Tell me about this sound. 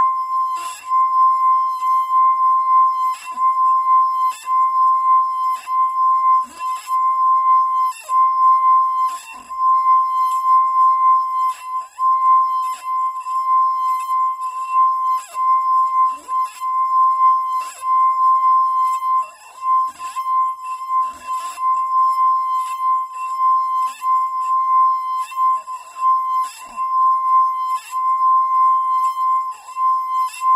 Wine Glass Sustained Hard Note C6
Wine glass, tuned with water, rubbed with pressure in a circular motion to produce sustained distorted tone. Recorded with Olympus LS-10 (no zoom) in a small reverberating bathroom, edited in Audacity to make a seamless loop. The whole pack intended to be used as a virtual instrument.
Note C6 (Root note C5, 440Hz).
clean, drone, glass, hard, instrument, loop, melodic, noisy, note, pressed, pressure, sustained, texture, tone, tuned, water, wine-glass